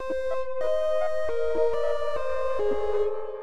Pad riff 1
1, pad, riff